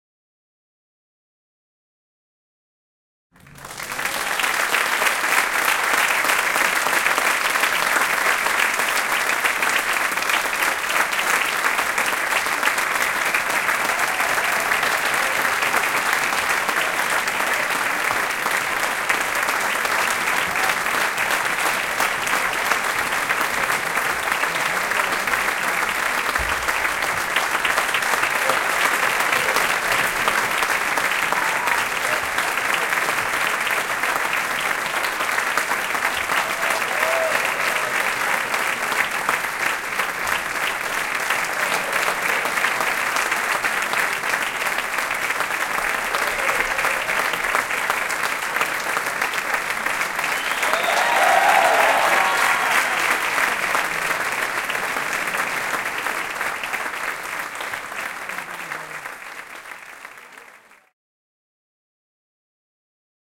Clapping in Concert Hall
Clapping in a Concert Hall after a classical music concert.
Recorded with a Zoom H5n.
adults, applaud, applauding, applause, audience, auditorium, clapping, claps, hand-clapping, theatre